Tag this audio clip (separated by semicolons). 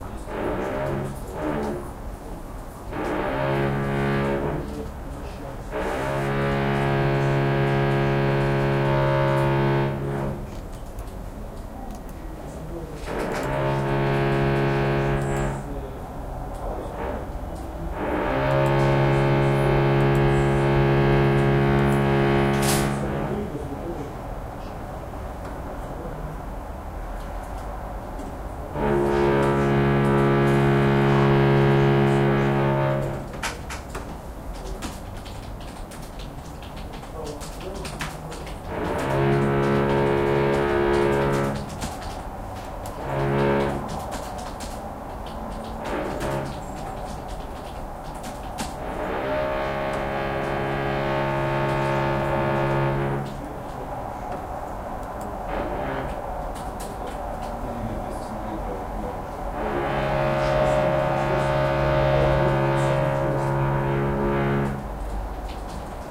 city; din; keyboard; noise; office; roar; rumble; thunder; work